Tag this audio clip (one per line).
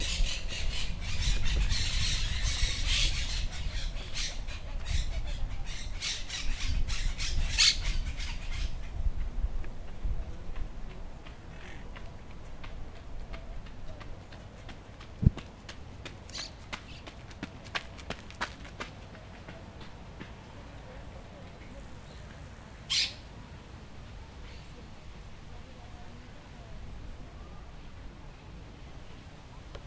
nature
bird
City
Environment